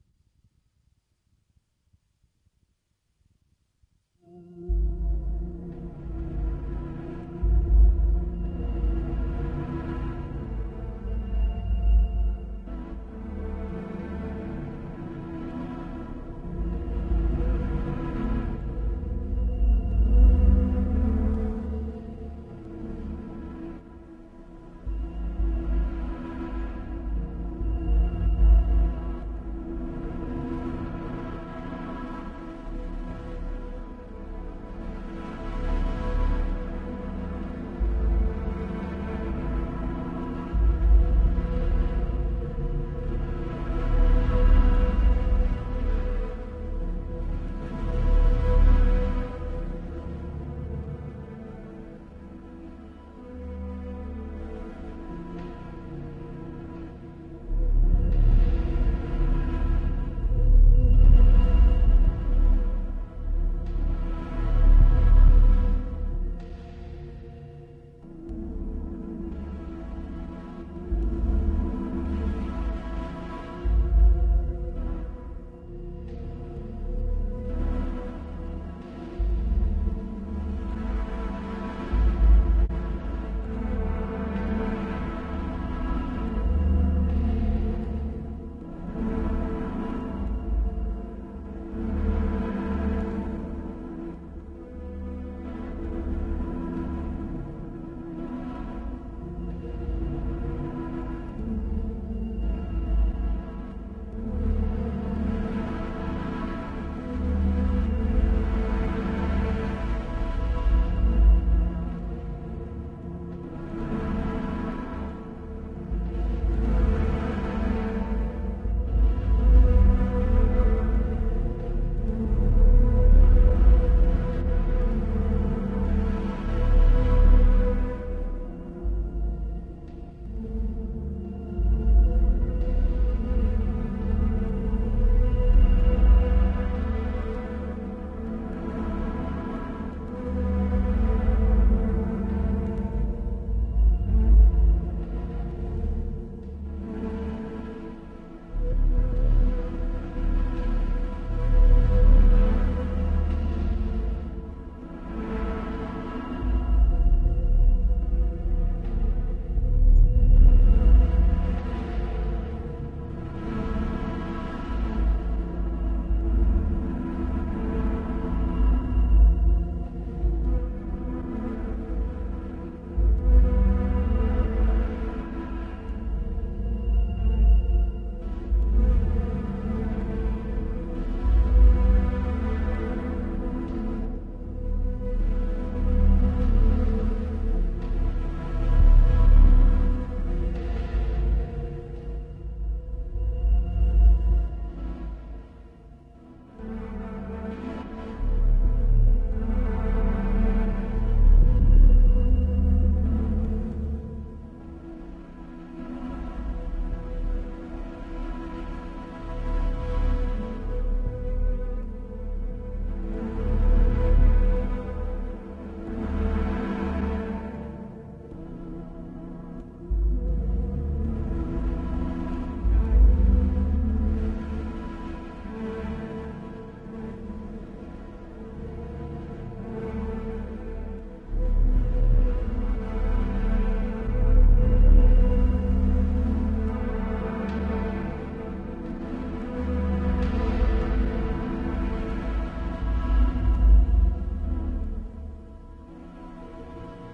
8tr Tape Sounds.